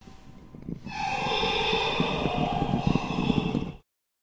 creepy distant laughter
I used this for a horror game mod, hence the rumbling sound in the background
creepy, english, female, girl, laugh, speak, talk, voice, woman